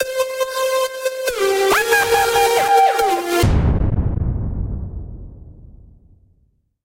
W.I.O.dubstep loop007
dubstep, drums, drum, synthesizer, electro, bass, synth, loop